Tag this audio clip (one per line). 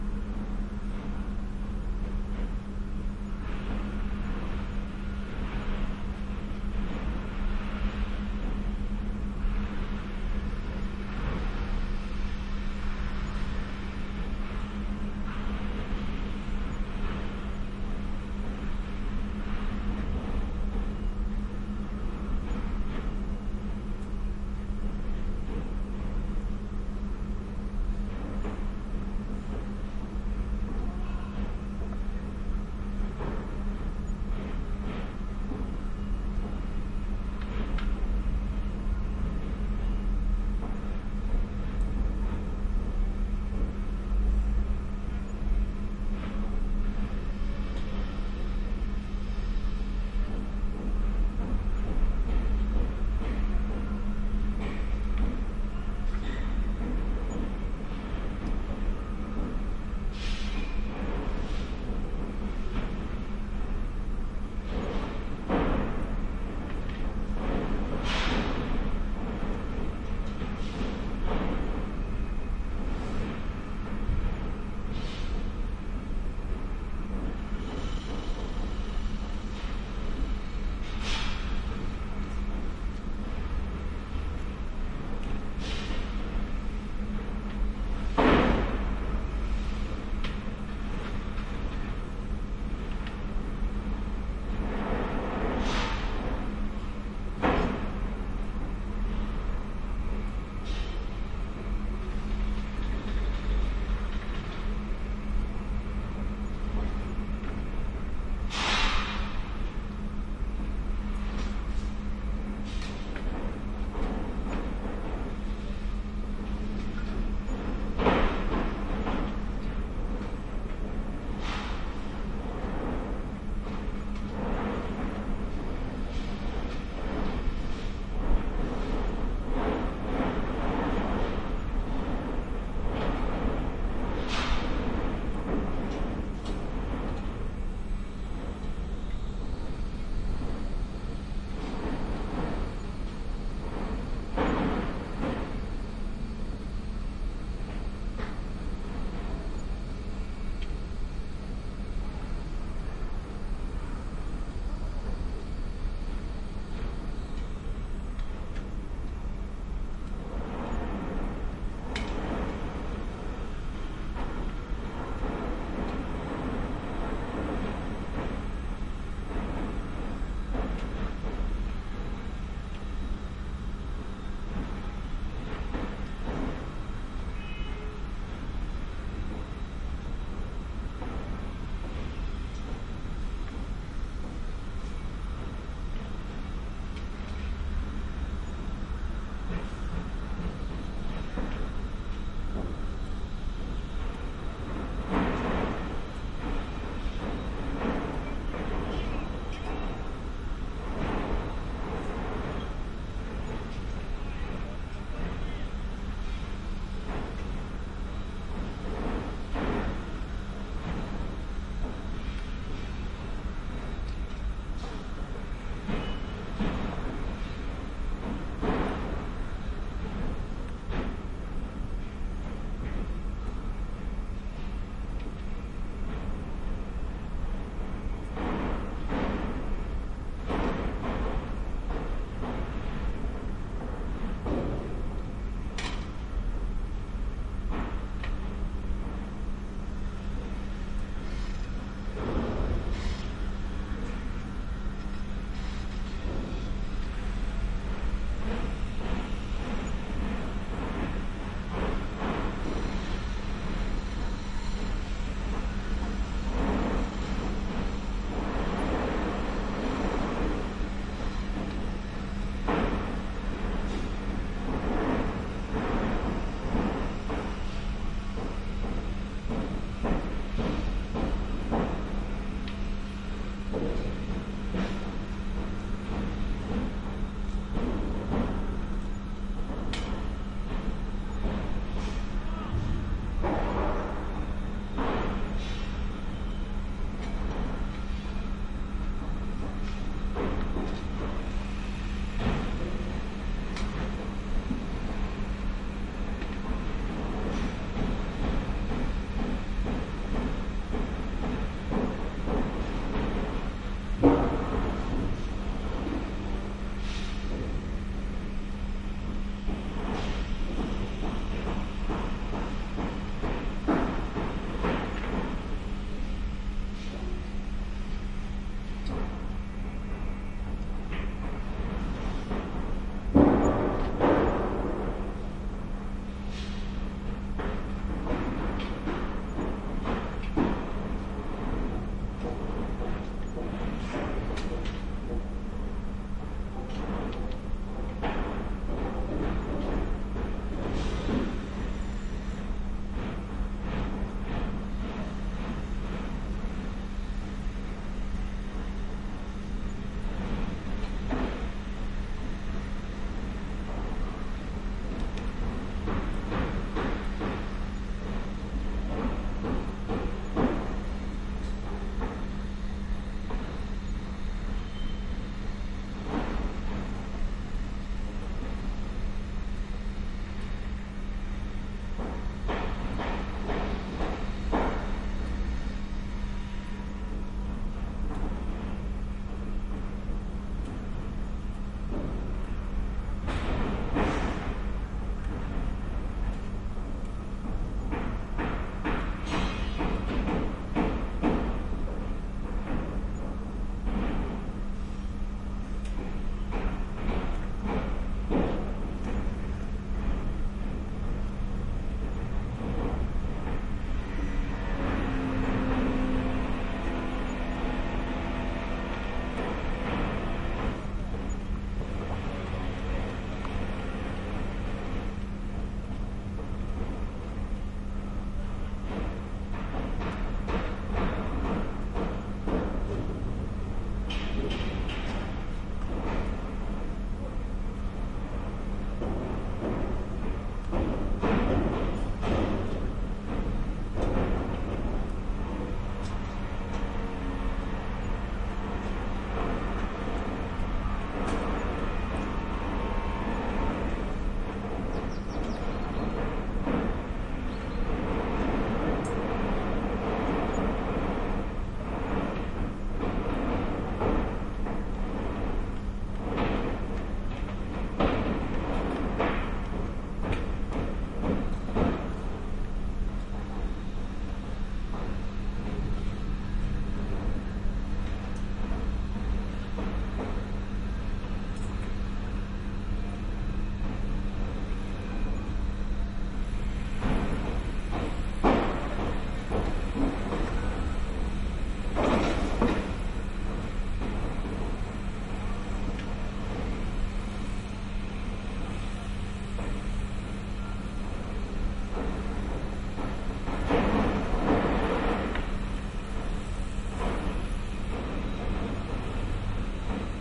construction
atmosphere
building